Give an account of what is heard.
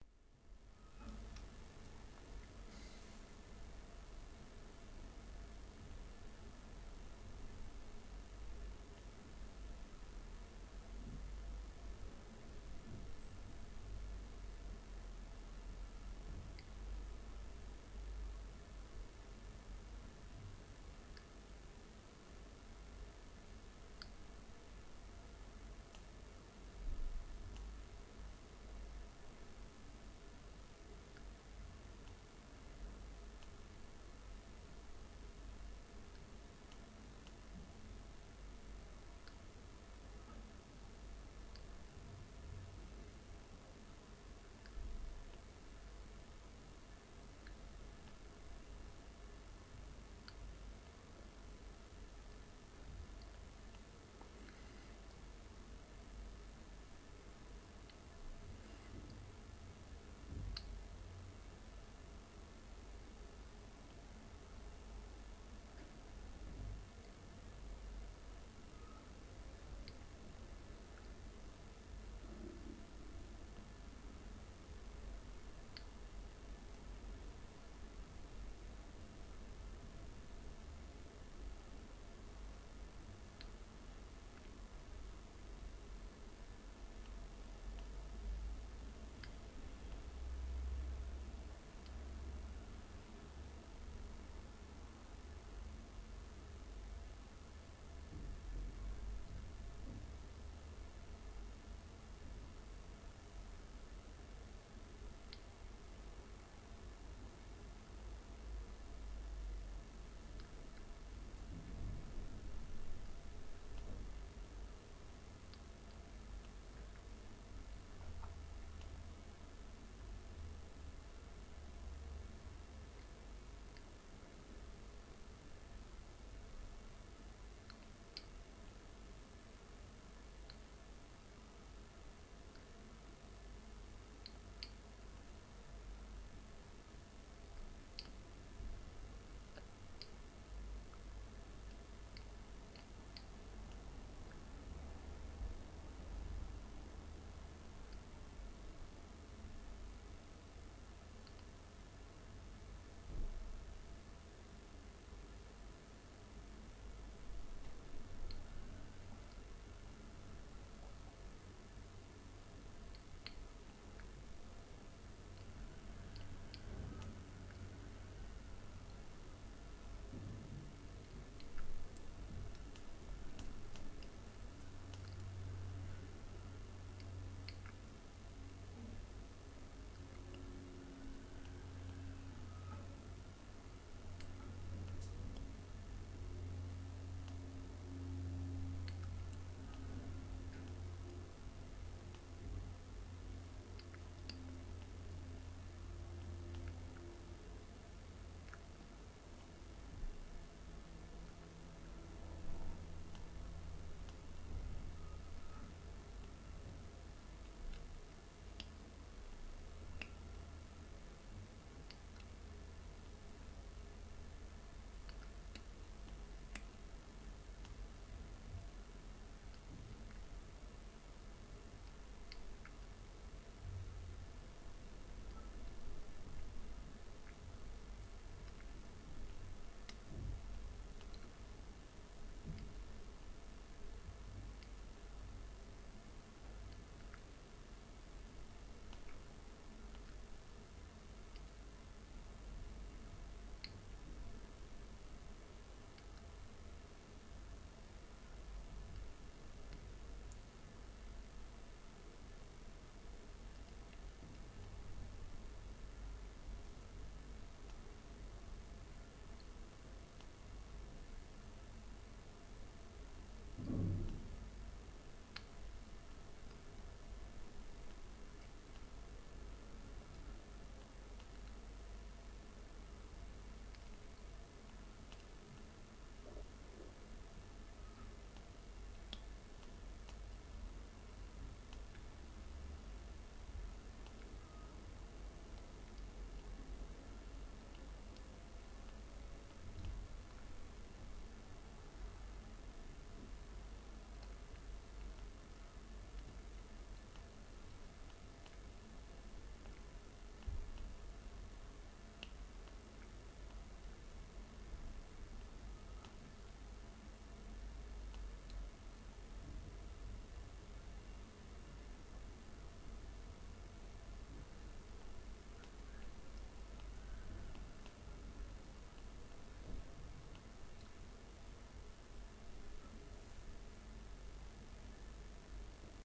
Engine Control Unit ECU UTV ATV Trail Path Channel Battery Jitter Wideband Broadband T2 T1xorT2 MCV Dual Carb SOx COx NOx Optical Link Fraser Lens Beam Mirror Field Iso Synchronous